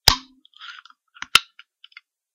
Soda can - Open
Opening a soda can
coke, tin, soda, open, can, cracking